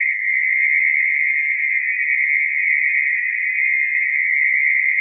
Some multisamples created with coagula, if known, frequency indicated by file name.